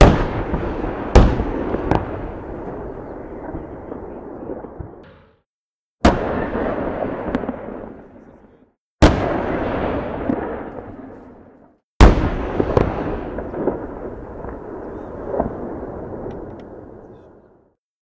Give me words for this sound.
Distant Tank Shots
Tank soldiers shooting their missiles on a training range.